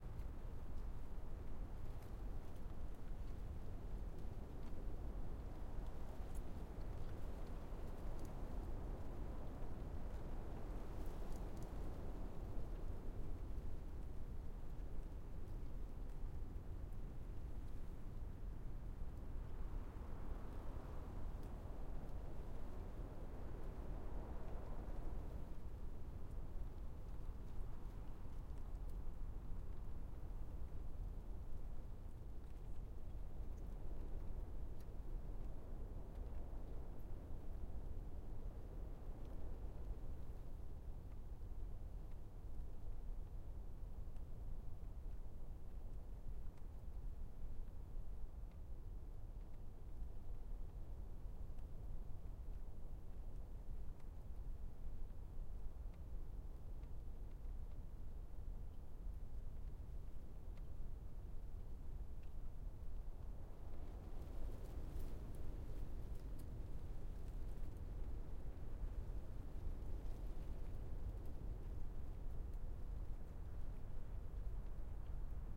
wind light calm soft breeze deep big gusty
big, breeze, calm, deep, light, wind